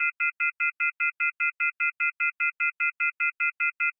Phone Off-Hook Tone
Pulsing tone generated when landline phone is left off the hook. Beeping sound reminding you to hang up the phone. Created from scratch using signal generators.
Alert, Phone-Off-The-Hook, Hang-Up, Beep